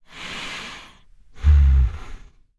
Bear breath, emulated using human voice and vocal transformer